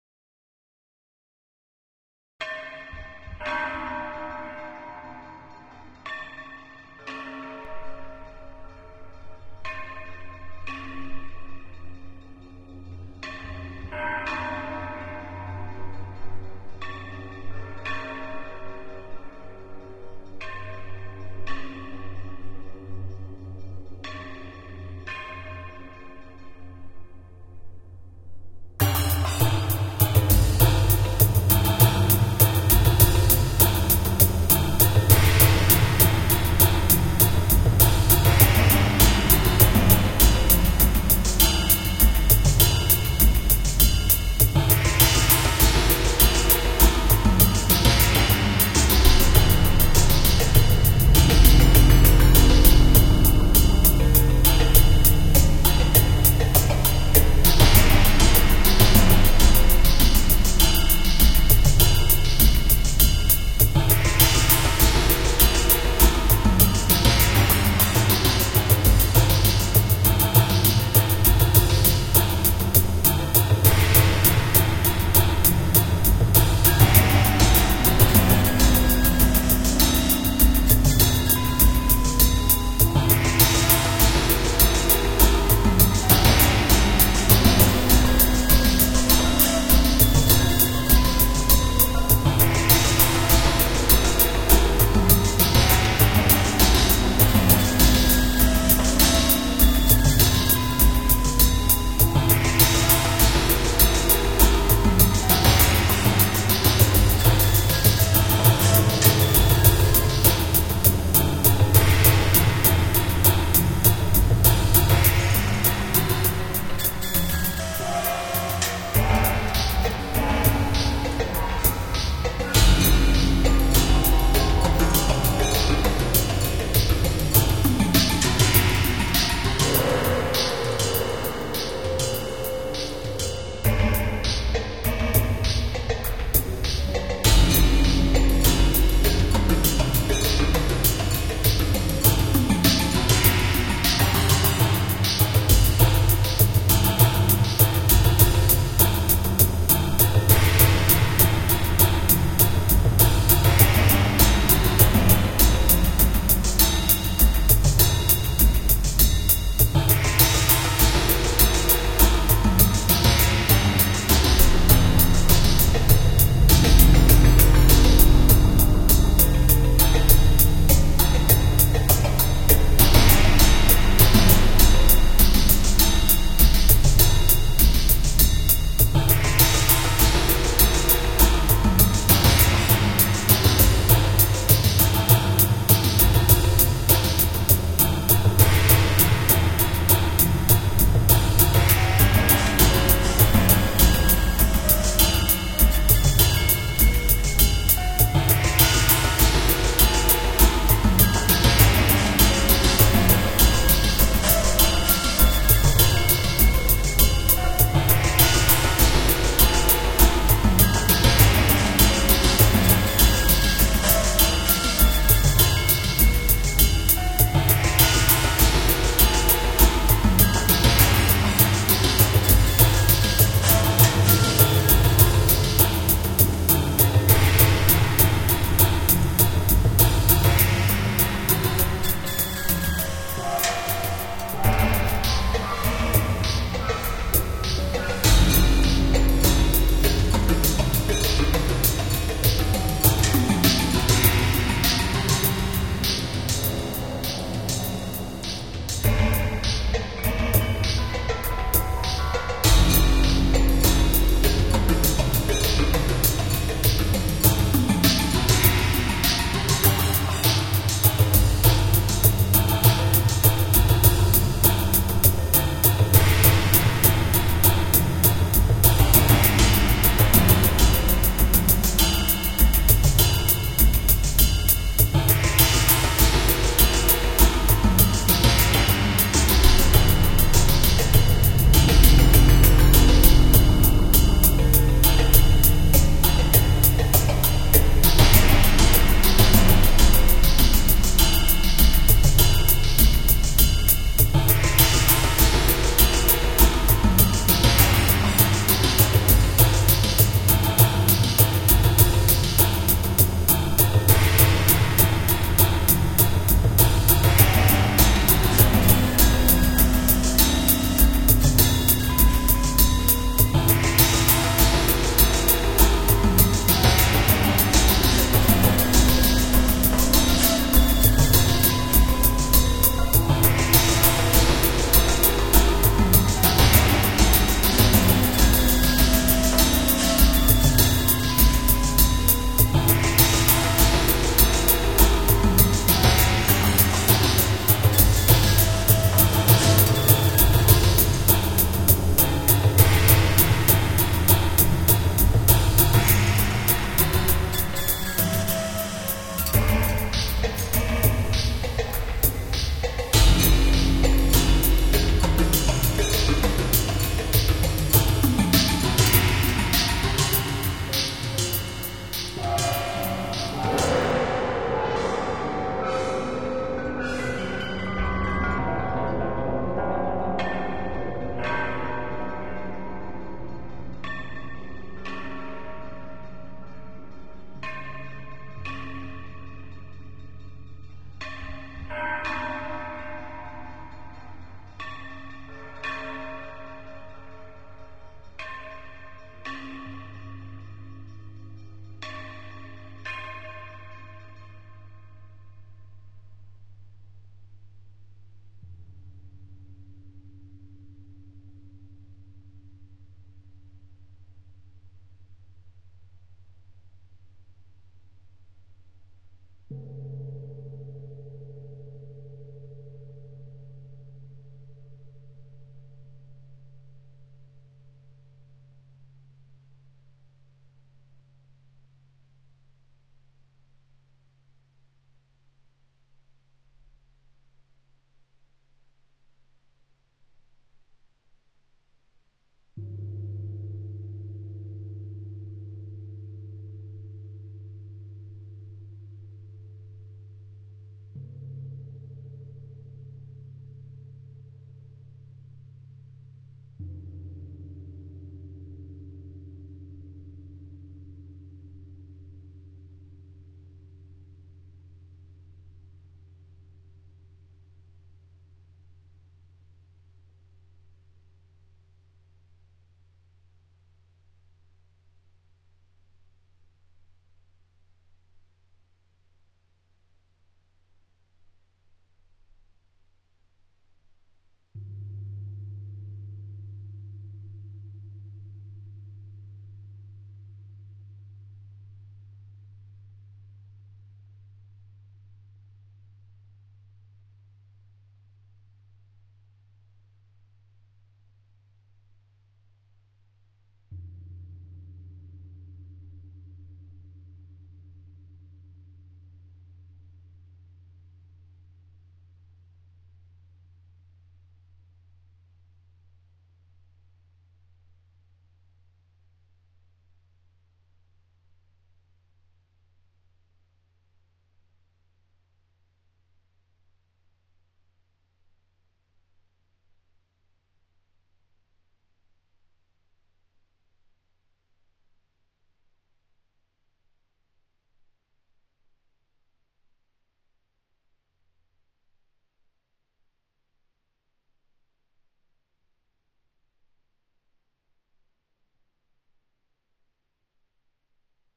trip hammer
groovy, percs, beats, r, funky